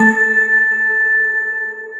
windows-like physical modeled alert sound original II [Oneshot] {Render as Loop}
UI sound effect. On an ongoing basis more will be added here
And I'll batch upload here every so often.